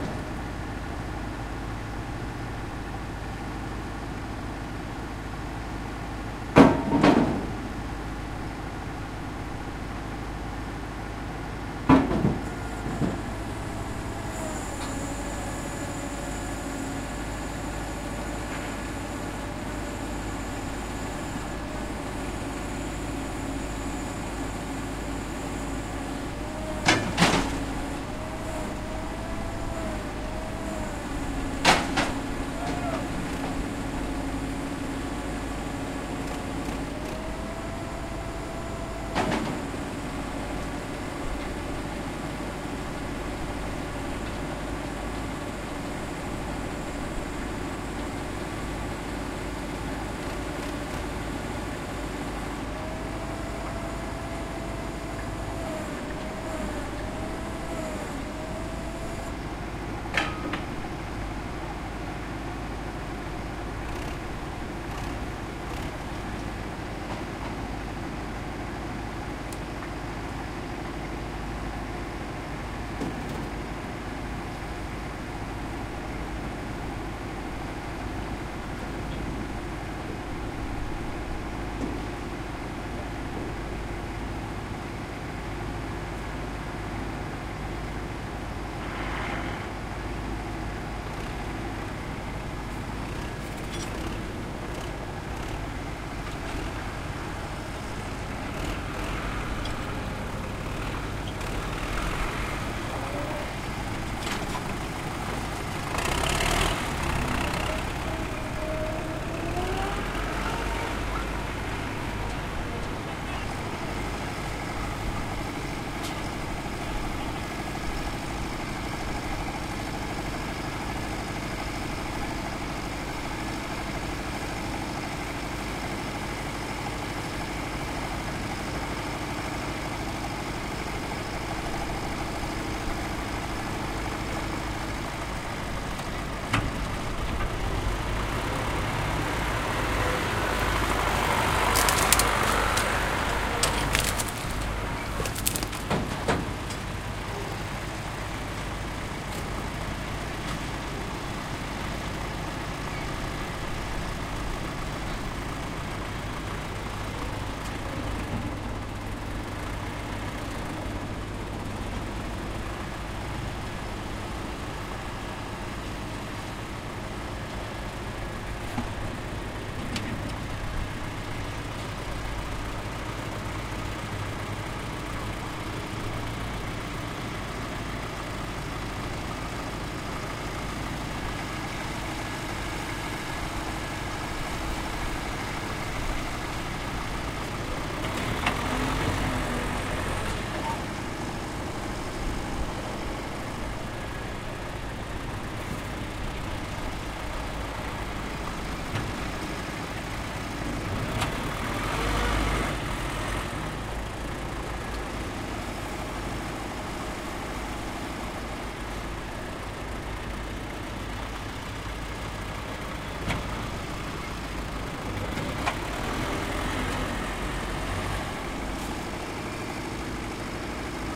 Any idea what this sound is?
Water supply repair construction. Workers done their work and start tidy up. Sound of truck and tractor.
Recorded 03-07-2013.
XY-stereo, Tascam DR-40